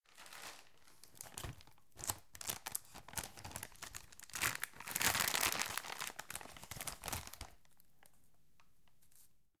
Parer bag Foley manipulating
opening, paper, foley, bag, plastic, plastic-bag, bolsa